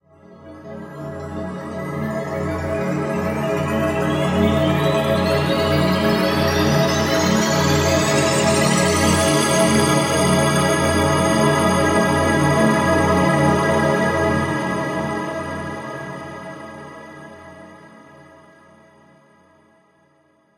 Deep and dark dramatic pad with alot of disonances. More of a scifi flavour in this due to all the bells rising.